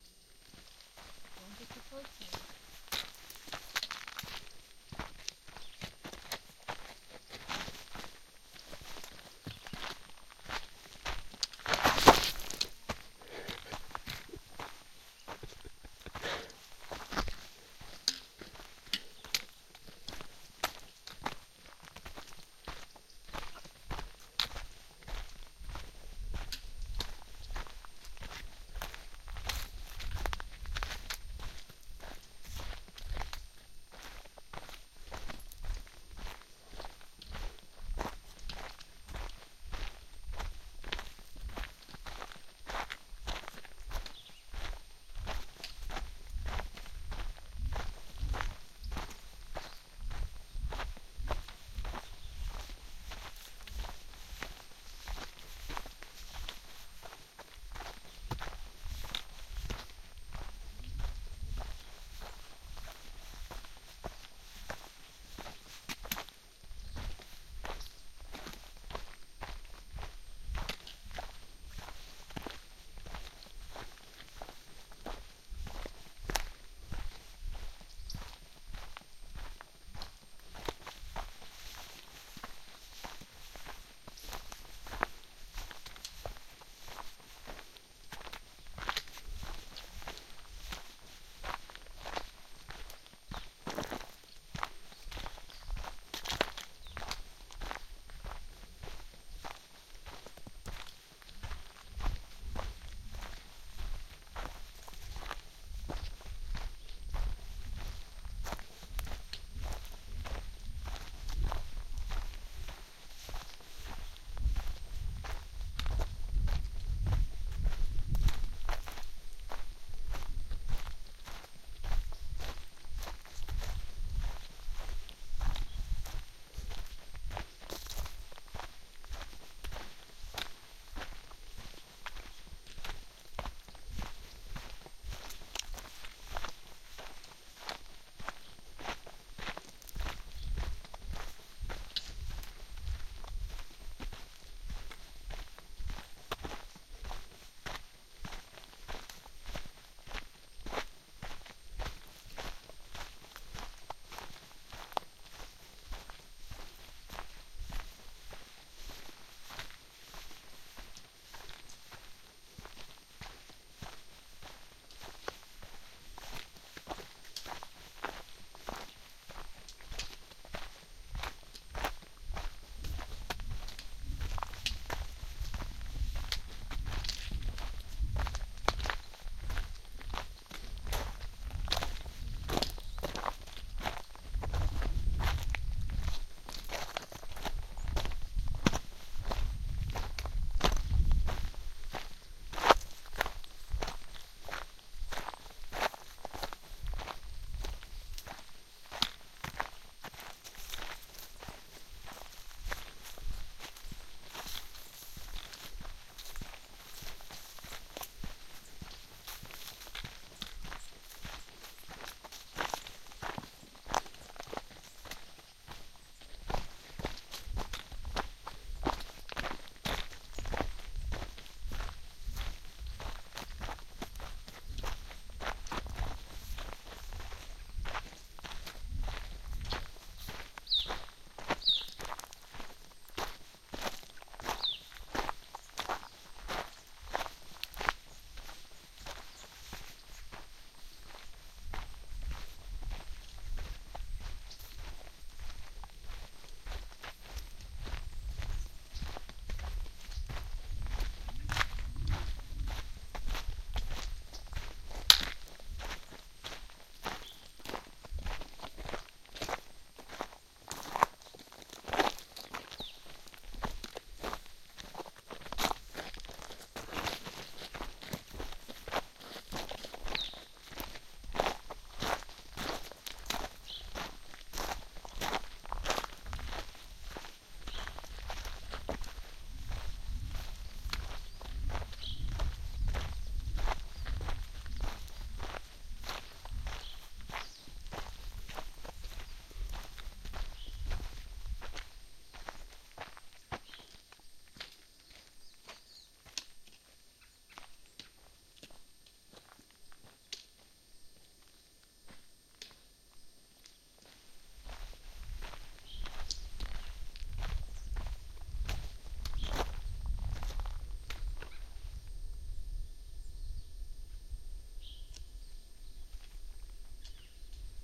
goat rocks walking
Walking a section of trail in the Goat Rocks Wilderness in Washington. Near the start of the recording you can hear me slip and almost fall and then laugh a bit. Recorded with Zoom H4 and Sound Professional binaural mics.Some post-processing was done to reduce the beeping sound that occurs with this recorder under some situations.
backpacking, binaural, feet, field-recording, geotagged, hiking, nature, outside, step, stride, trail, travel, walk, walking